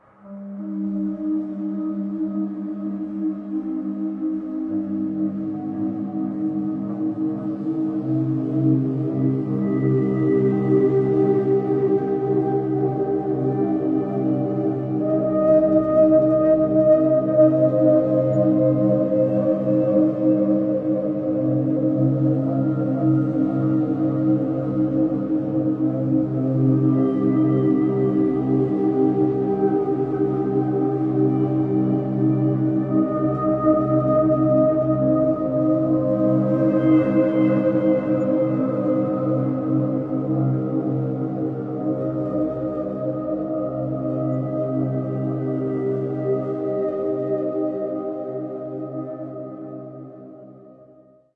FX The Gegenschein
Another part of an old PS-3100 session I processed